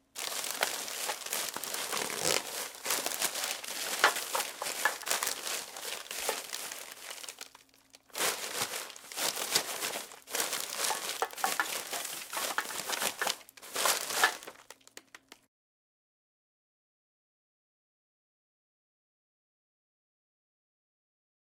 Trash sorting small
sorting through a trash can
clang, garbage, sorting, Trash, can, small